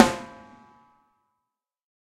KBSD2 E22 VELOCITY8
This sample pack contains 63 stereo samples of a Ludwig Accent Combo 14x6 snare drum played by drummer Kent Breckner and recorded with a choice of seven different microphones in nine velocity layers plus a subtle spacious reverb to add depth. The microphones used were a a Josephson e22s, a Josephson C42, an Electrovoice ND868, an Audix D6, a Beyer Dynamic M69, an Audio Technica ATM-250 and an Audio Technica Pro37R. Placement of mic varied according to sensitivity and polar pattern. Preamps used were NPNG and Millennia Media and all sources were recorded directly to Pro Tools through Frontier Design Group and Digidesign converters. Final editing and processing was carried out in Cool Edit Pro. This sample pack is intended for use with software such as Drumagog or Sound Replacer.